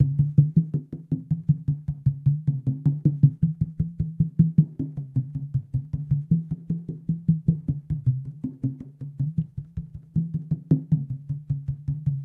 phased agua
Hitting a almost empty 5 liter water bottle. making pitch changes by moving the bottle diagonally. processing: noise reduction, phaser.